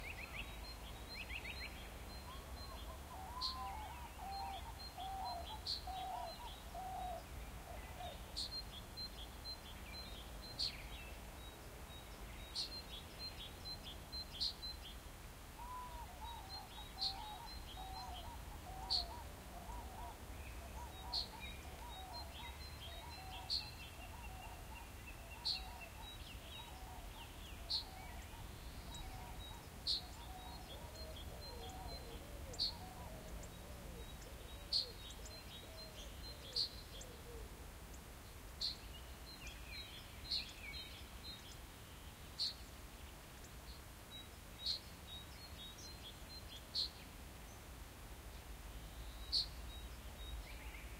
ambient meadow near forest single bird and eurasian cranes in background stereo XY MK012
This ambient sound effect was recorded with high quality sound equipment and comes from a sound library called Summer Ambients which is pack of 92 audio files with a total length of 157 minutes.